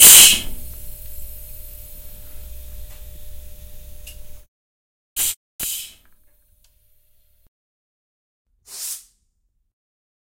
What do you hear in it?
Air pressurising
Turning the air valve feeding air to power tools such as air-powered metal grinders and metal cutters. Recorded using onboard mics of the Roland r26.